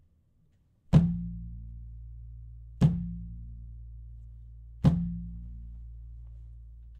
hand hitting table
a fist hitting a table